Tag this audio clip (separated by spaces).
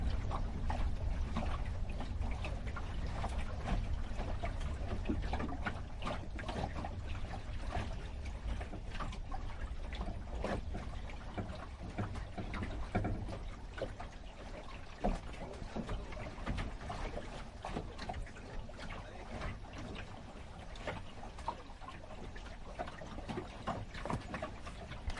inside-boat-ambience water